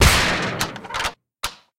Original Gun sound Design using metal gates, wooden blocks, and locks.
weapopn, sniper, attack, shot, rifle